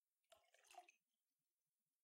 water pouring from a glass